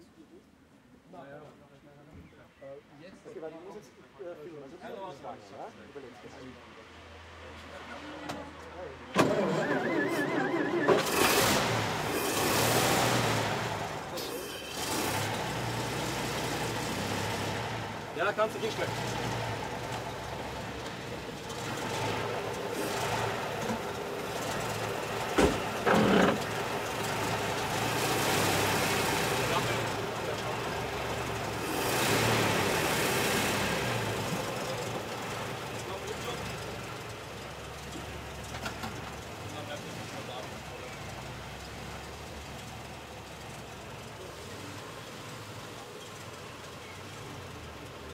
RollsRoyce Start
Recorder: Fostex FR-2
Mic: Audio Technica AT-835b (LoCut on)
Mic Position: about 20cm (tip) away from front of motor block
Post-processing: None
The Rolls Royce limousine is a model from about 1930-1940; Since I haven't asked, I don't know the actual model number.
This is a by-chance recording during a short break at a film set - Which is why there are people in the back talking.
Also, I hadn't the time to remove the LoCut.
The motor first starts, then inserts the rear gear and drives slowly backwards (away from mic)